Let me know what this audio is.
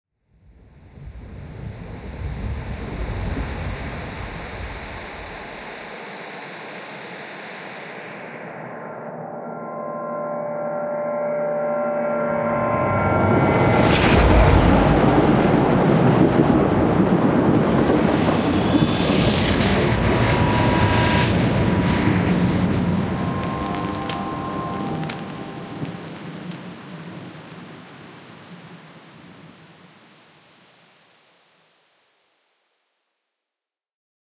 Thunder Strike Video Game
Thunder Strike for the master degree in video games of University of Málaga (UMA). There is a rainy ambience filtered with a HPF before the thunder sound. For the thunder there are 3 parts: a metallic whoosh, the impact and a shock wave
Ambience, Cinematic, Design, Games, Impact, Nature, Sound, Thunder, Video